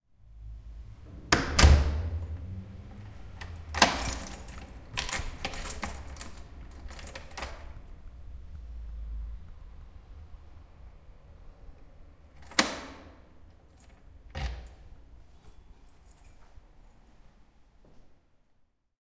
Just closing my front door. (mono)